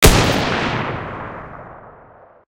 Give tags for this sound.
rifle army sniper warfare war firing shooting gun attack agression shot weapon projectile pistol military

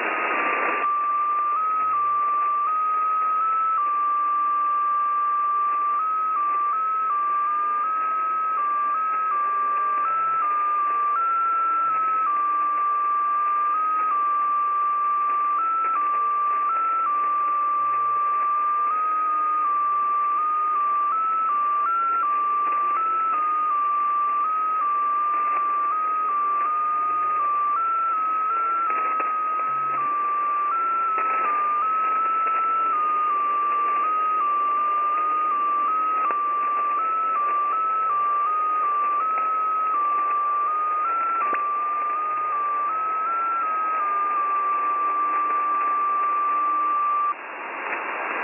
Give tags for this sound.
mystery shortwave encrypted-content music static 14077 radio the-14077-project numbers-station creepy melody

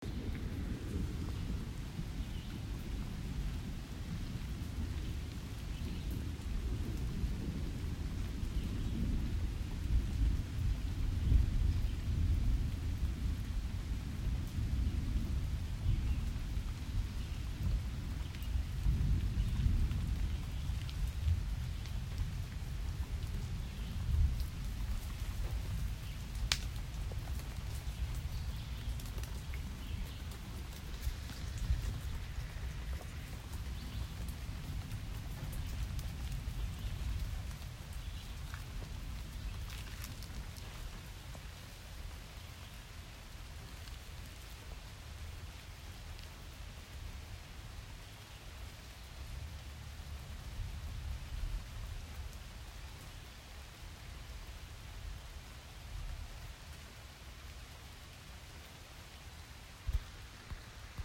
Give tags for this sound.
crack field-recording ambience twig nature

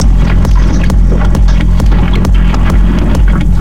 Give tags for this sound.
drumloop multisample techno trance dynamics granular drum loop vocoder bass electronic sequence reverb recorded filter

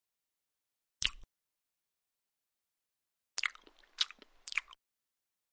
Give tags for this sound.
Panska Czech CZ Pansk

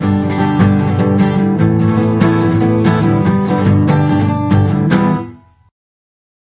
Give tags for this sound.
guitar flamenco acoustic

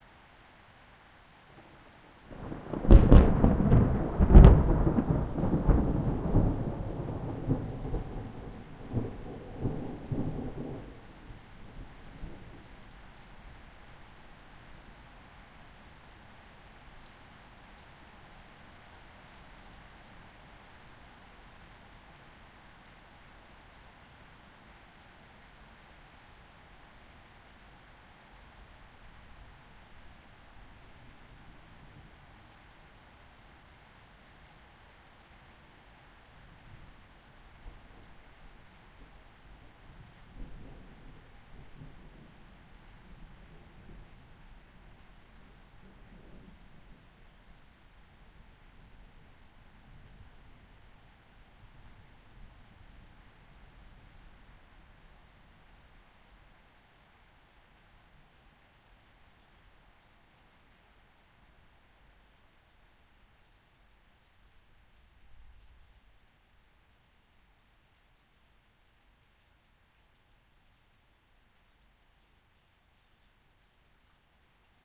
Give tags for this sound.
storm parrots nature atmosphere field-recording birds boom rain weather bang thunder

